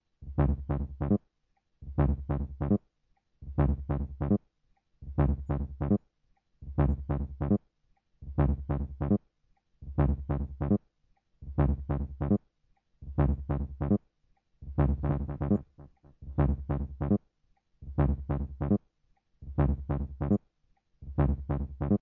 beat with kaoos
mix, sample, kaoos